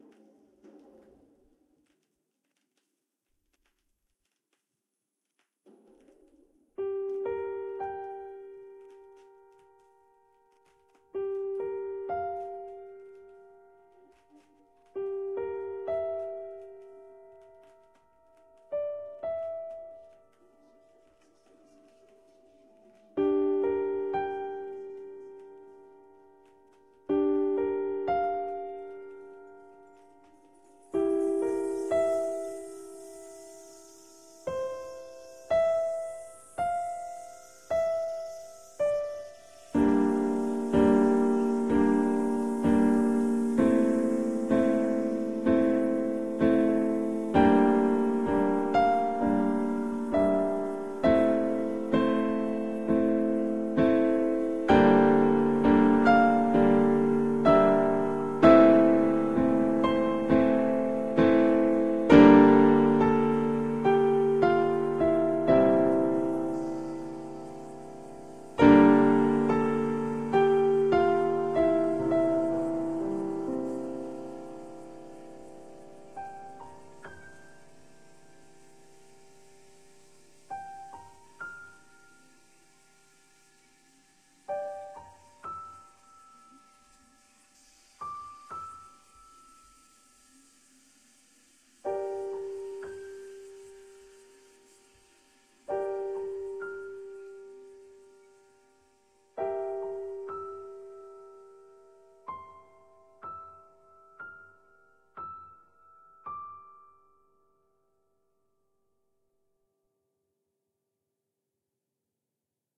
March Cloud #17
grand, melancholic, piano, film, cloud, ost, mellow, anime, tragedy, ableton, cinematic, keyboard, slow, soundtrack, movie, march, background, sad